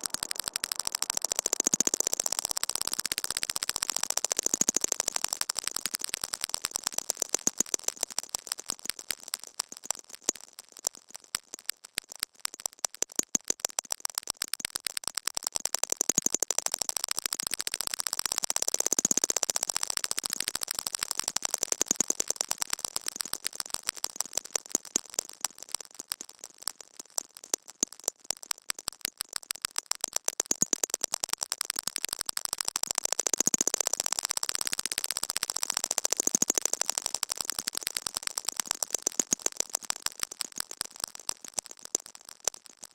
synthetic, cricket-like sounds/atmo made with my reaktor-ensemble "RmCricket"
atmosphere, glitch, clicks, cricket, ambient, synthetic